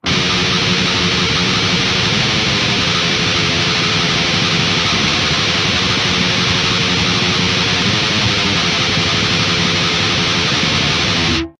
an alt picking riff recorded with audacity, a jackson dinky tuned in drop C, and a Line 6 Pod UX1.